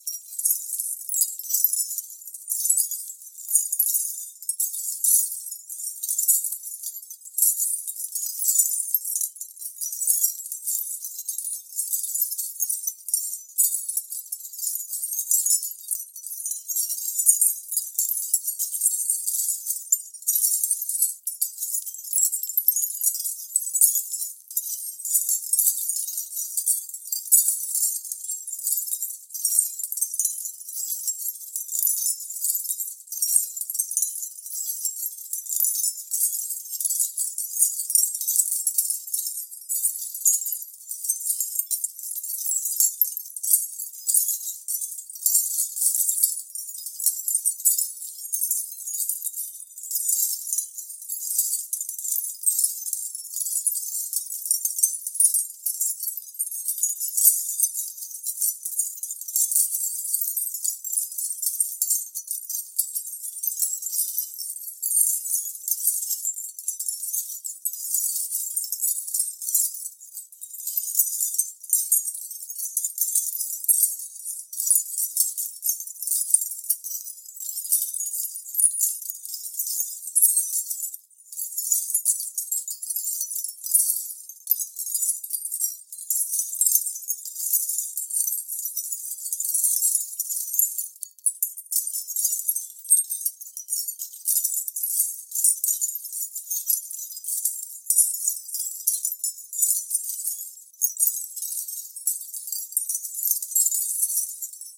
Glass shard tinkle texture
A seamlessly looping, composited edit of me pouring small glass fragments on to a pile of shattered glass. The sound is composed of 11 copies of the same recording, each with unique panning, volume, start offset and attack transient shaping.
This sound should be good for pretty much any sound design use where you need to layer in a sustained tinkling / jingling texture. It will work neat for "coin drop" type sounds for video game use, if you cut out small segments and apply envelope shaping to those. Or just treat the entire sound with a massive reverb for some "Crystal Cave" vibes :)
If you find some use for this sound in your project, I would love to check it out. Please leave link to yours in the comments! <3
coin, glass, texture